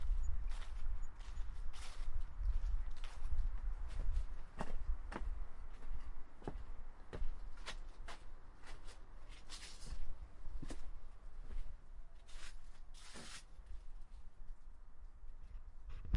walking into a Shack